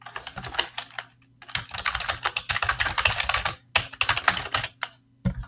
Old Keyboard Typing 2
Typing sounds from an old computer keyboard.
keyboard, old, type, vintage